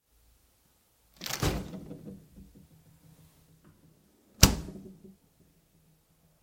fridge open shut
A quick closeup open and shut of a fridge door, complete with items rattling. Recorded on a Roland R-26, and tidied up with Izotope plugins
open, door, refrigerator, slam, closing, opening, shut, close, fridge